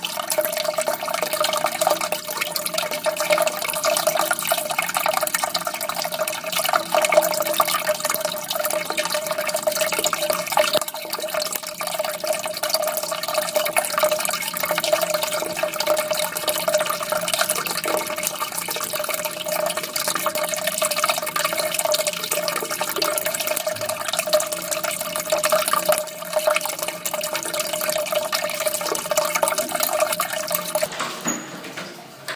Broken mens toilet. Water keeps on running. 30s.